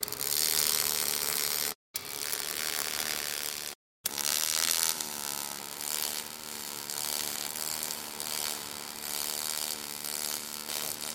Noize of a Welding machine